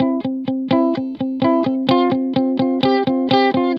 guitar recording for training melodic loop in sample base music